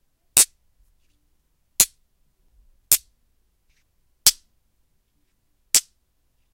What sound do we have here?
magnets clicking together
Two magnets clicked together a few times